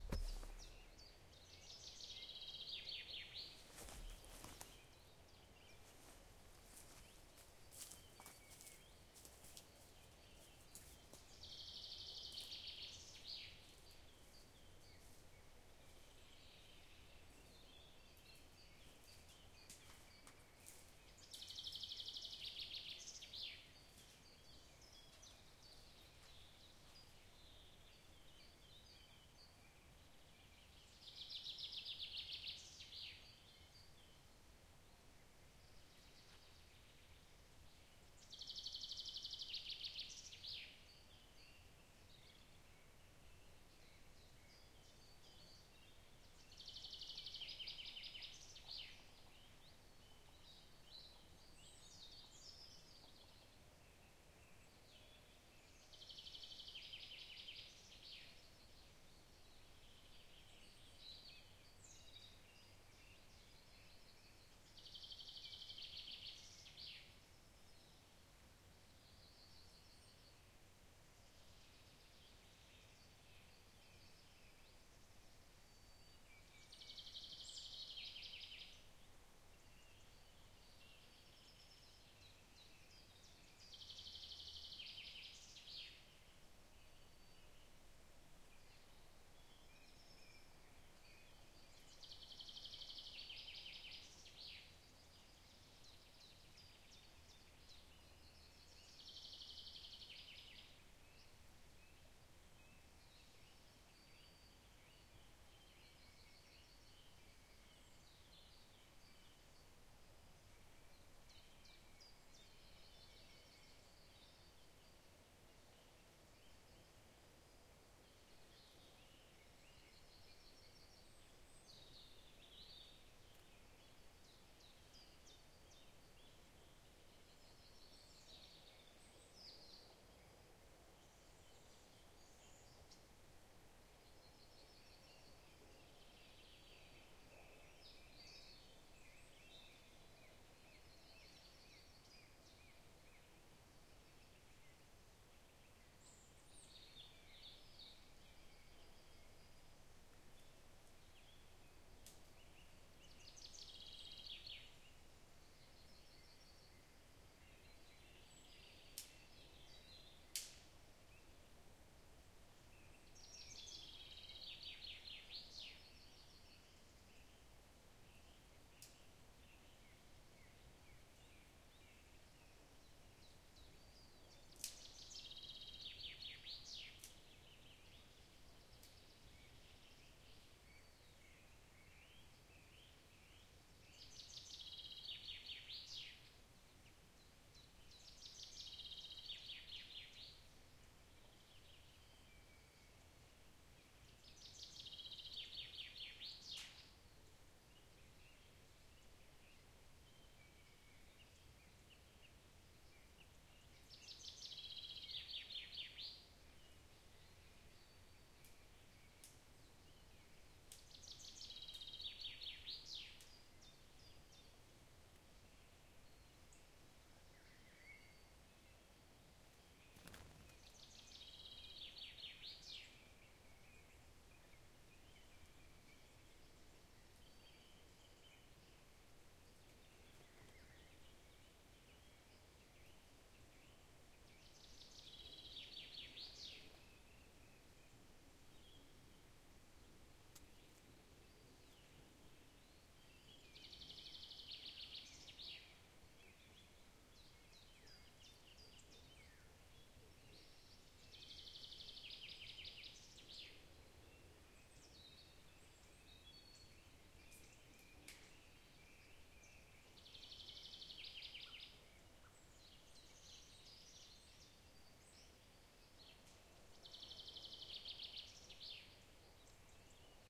natural area - central european upland forest surround sound